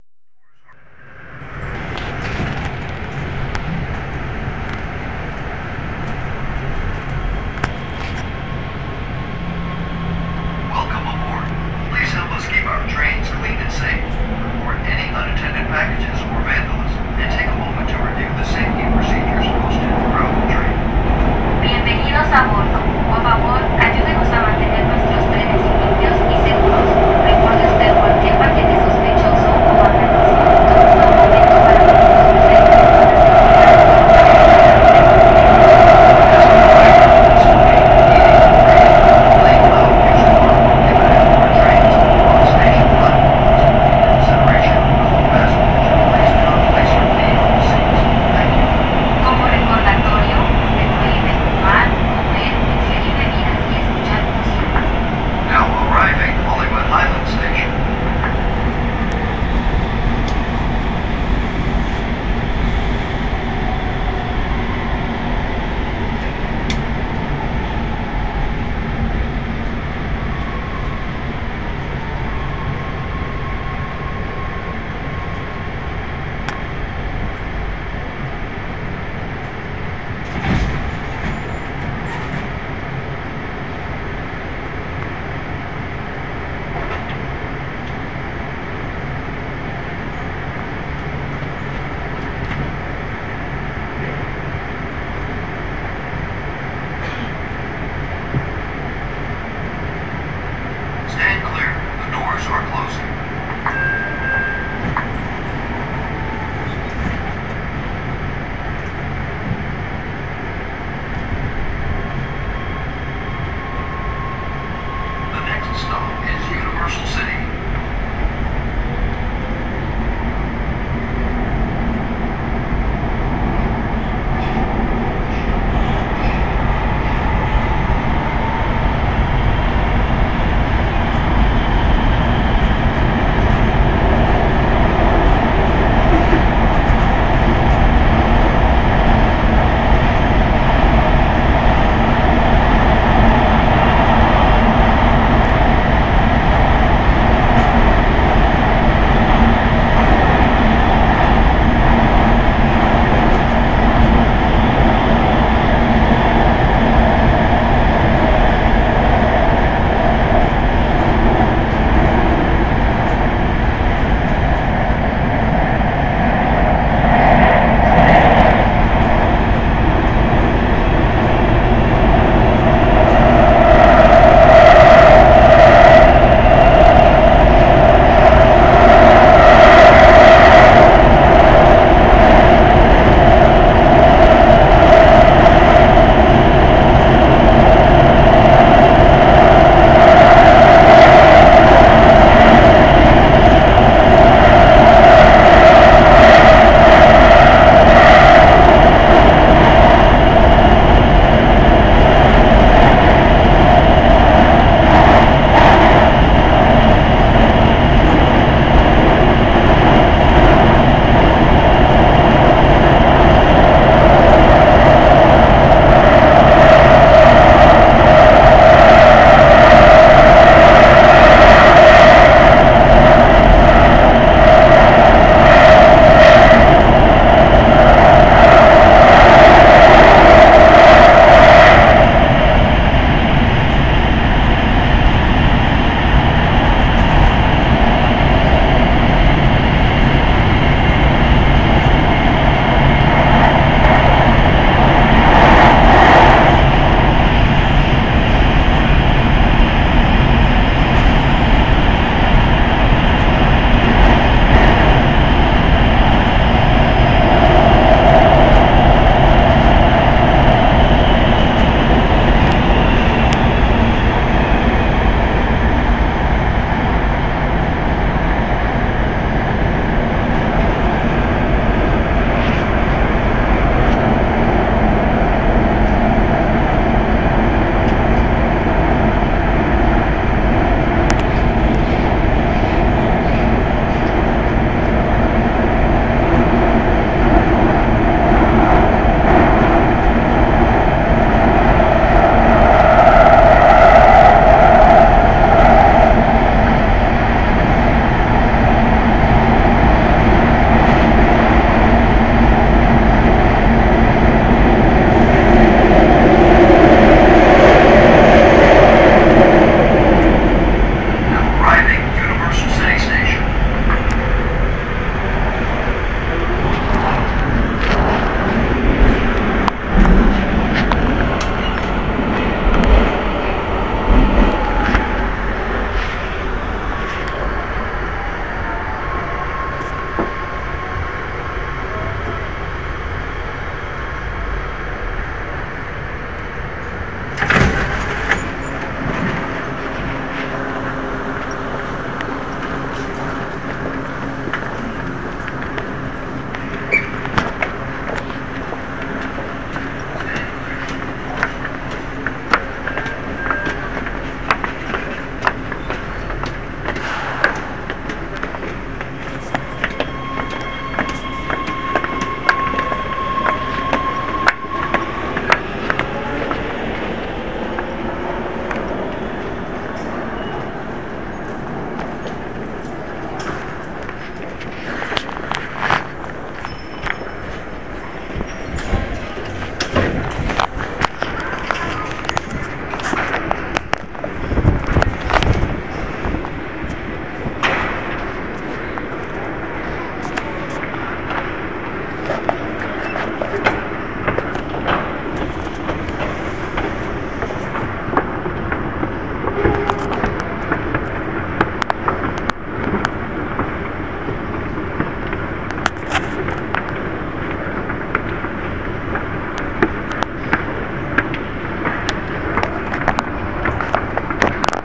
subway los angeles metro red line universal city to union station
early morning (6am) metro ride universal city station to union station
recording, field, atmophere, ambient